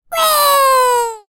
ratt placerad atom v6
Cartoon voice pitched
cartoon, happy, pitched, voice, weee